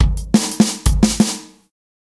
eardigi drums 10
This drum loop is part of a mini pack of acoustic dnb drums